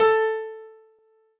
Piano ff 049